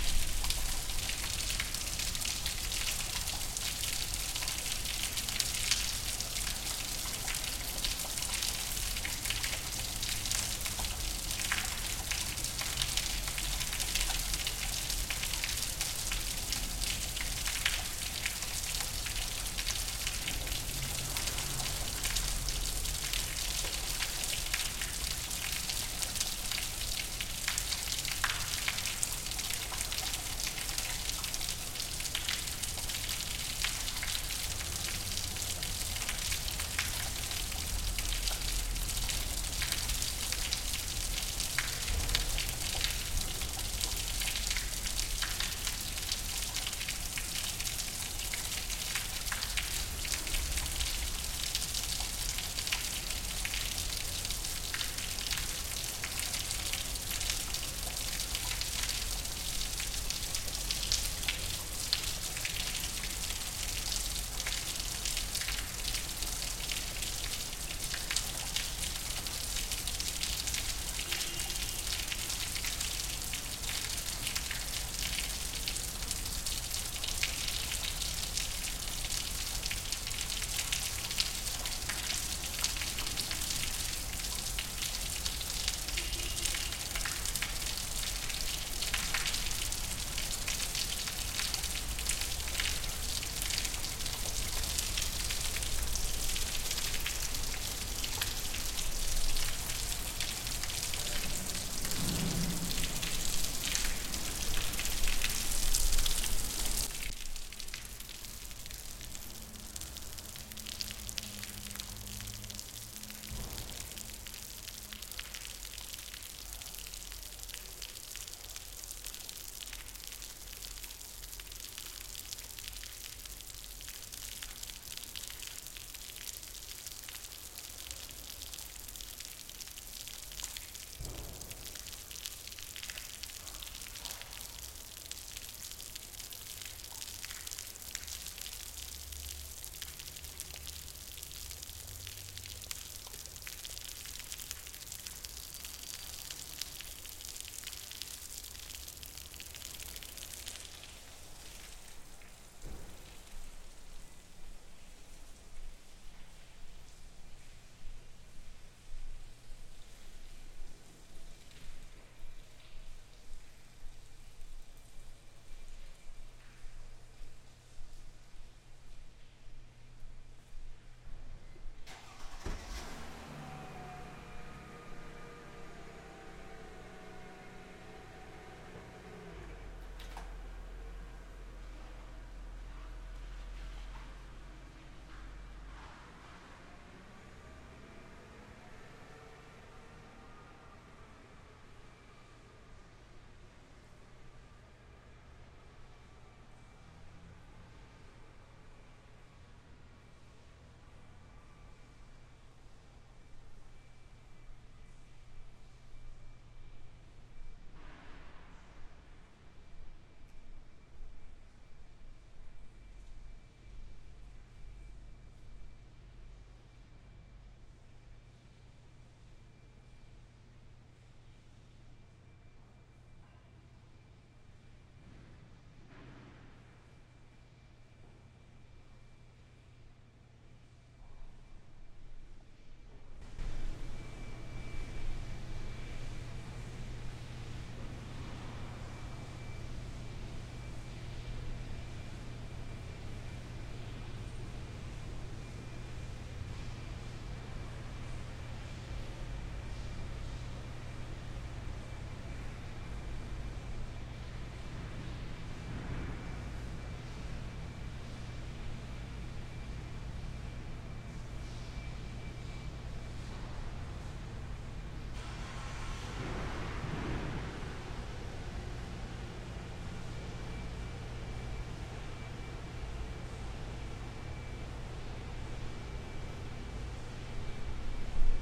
leak in garage
Water is leaking in a garage.
white, leak, keys, pipe, pink, people, car, space, river, garage, reverb, water